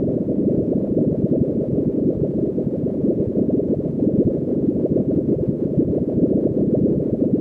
BubblesLoop Some
This is not a recording of bubbles -- it is a synthetic loop that only sounds something like bubbles. Created in cool edit pro.